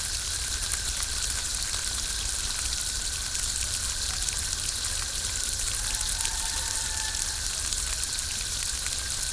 sonicsnaps EBG 11b
Fountain and a rooster.
Field recordings from Escola Basica Gualtar (Portugal) and its surroundings, made by pupils of 8 years old.
sonic-snap, Escola-Basica-Gualtar